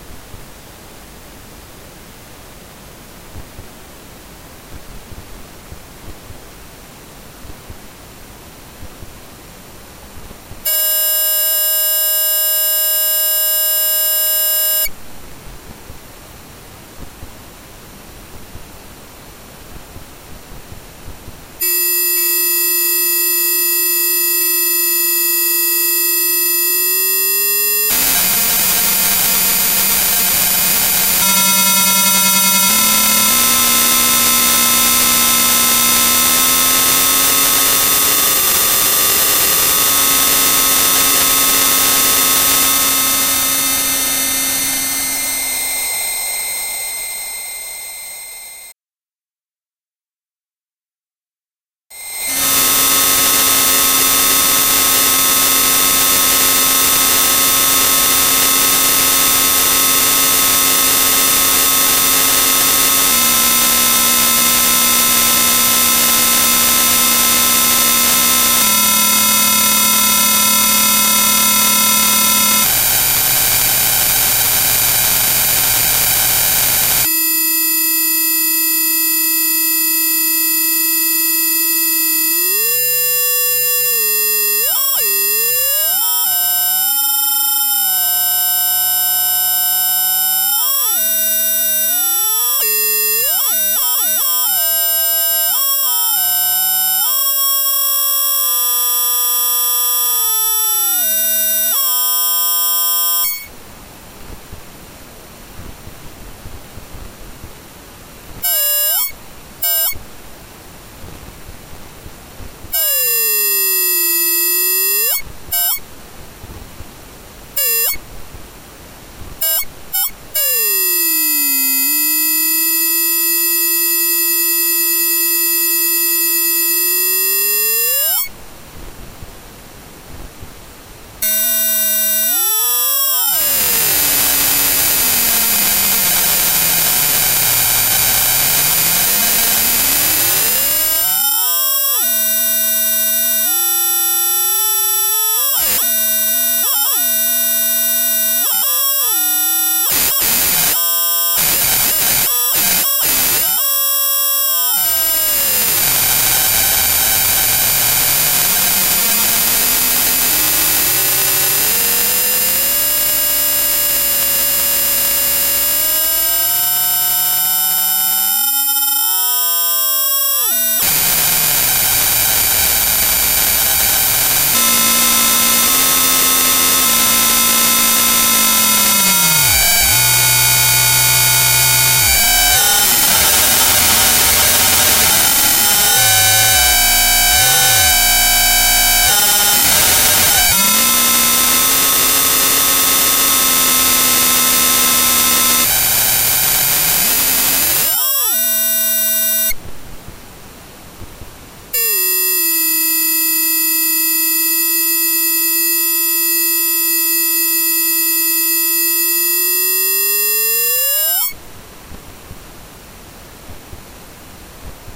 A 3,5 minute sample of my broken SVEN headphones lo-fi sound. Thought it might be useful for someone.
Recorded with headphone mic in Adobe Audition on my laptop with an addition of compression and EQ.